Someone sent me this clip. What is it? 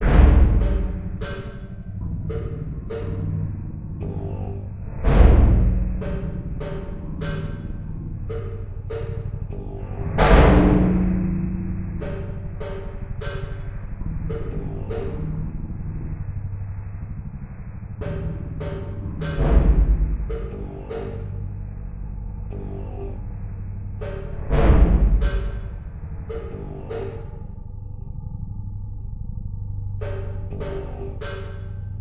Battub Clanking v2
made of two Sounds:
1.Hitting bathtub inside and outside with my Fist, recorded with a ultra cheap microphone Unit of my MP3 Player.
2. Simple Synth
+ Reverb
bathtub, eerie, metallic, clanking